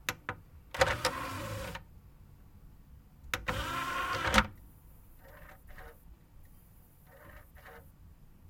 videoPlayer OpenClose
The video player BBK DV214Sl open and close tray.
BBK, close, close-tray, open, open-tray, video-player